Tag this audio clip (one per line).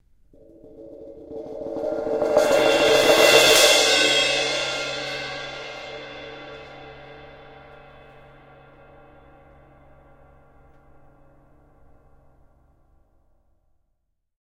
attack
cymbal
long
roll
swell